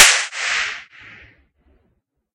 More impulse responses recorded with the DS-40 both direct to hard drive via USB and out in the field and converted and edited in Wavosaur and in Cool Edit 96 for old times sake. Subjects include outdoor racquetball court, glass vases, toy reverb microphone, soda cans, parking garage and a toybox all in various versions edit with and without noise reduction and delay effects, fun for the whole convoluted family. Recorded with a cheap party popper
convolution, reverb